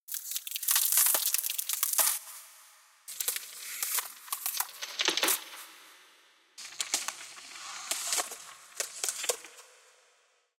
Ice Cracking Sequence
ambience, atmosphere, breaking, cinematic, cold, crack, cracking, creaking, creative, Design, film, Foley, freeze, frozen, futuristic, game, granular, ice, sci-fi, sfx, snow, sound-effect, sound-effects, special-effects, texture, winter